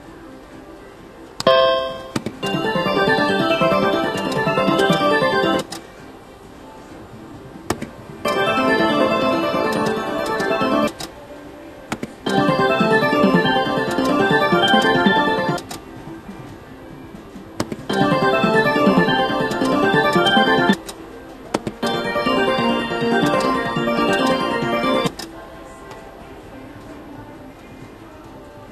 WOF slots5
Casino background noises, slot machine noises, clicking,